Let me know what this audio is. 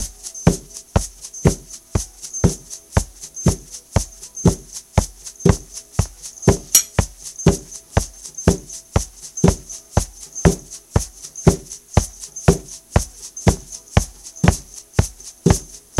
OPEN UP Pt2 Percussion
A collection of samples/loops intended for personal and commercial music production. For use
All compositions where written and performed by
Chris S. Bacon on Home Sick Recordings. Take things, shake things, make things.
acoustic-guitar, beat, drum-beat, Folk, harmony, looping, loops, percussion, rock, samples, synth, vocal-loops